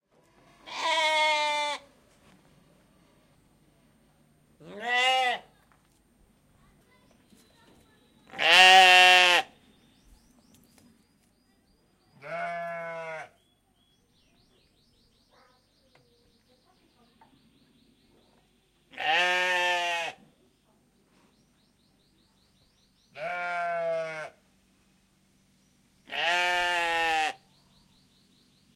1203 hungarian sheep
Hungarian sheep bleating in an open air farm museum.